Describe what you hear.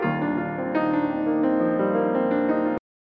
Piano motif (1)
Some punches and touches on piano
melody, acoustic, piano